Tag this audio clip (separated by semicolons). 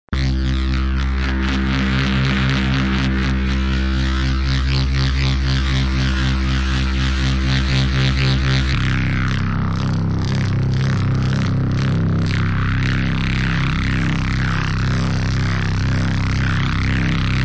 ableton; bass; drum; dubstep; massive; processed; synth